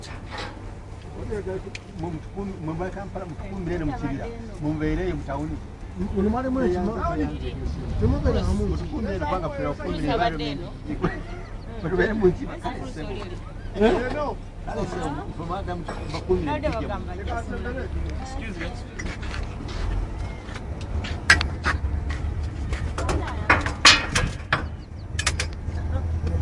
People chatting on the ferry boat to Kalangala in Uganda
People chat as the boat prepares to dock on the island of Bugala after the 3 hour journey across Lake Victoria from Entebbe
ambience, ambient, atmo, atmosphere, background, field-recording, late, noise, people, soundscape